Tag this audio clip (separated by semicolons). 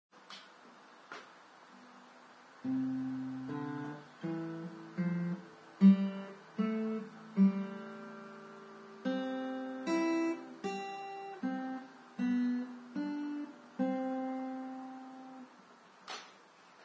acoustic; guitar; practice